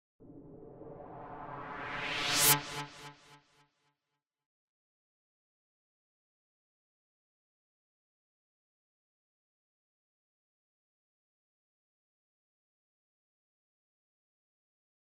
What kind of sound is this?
Musical motif that could be used to evoke a suspenseful moment.